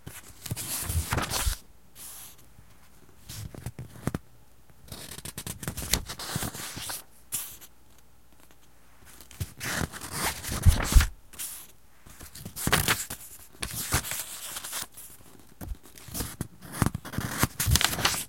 Flipping Through A Book
flipping through a novel
recorded with a zoom mic
book, flip, reading, turn